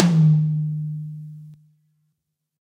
High Tom Of God Wet 017
realistic, tom, drumset, high, kit, set, drum, pack